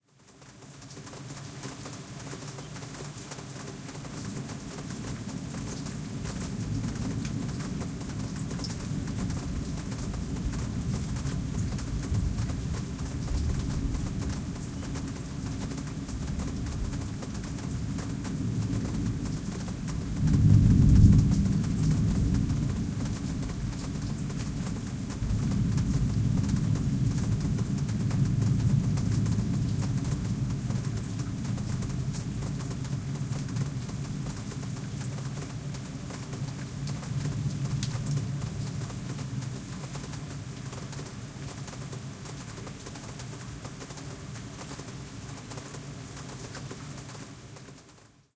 20180421.rain.thunder.26
Rain falling, with thunder. Clippy XLR EM172 Matched Stereo Pair (FEL Communications Ltd) into Sound Devices Mixpre-3. Recorded at Sanlucar de Brrameda (Cadiz province, S Spain)
field-recording, rain, storm, thunderstorm, weather